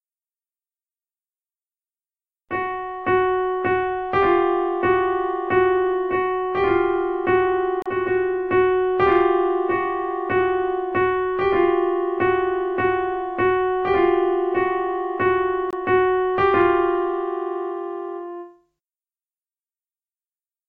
A simple piano melody created for the Music Stock of CANES Produções.
It's an easy-to-edit loop, suspenseful melody, can fit a thrilling dark scene, but may fit better inside a game.
Gothic, anxious, background-sound, bogey, creepy, drama, dramatic, fear, film, game, haunted, hell, horror, macabre, nightmare, phantom, piano, scary, sinister, spooky, suspense, terrifying, terror, thrill, weird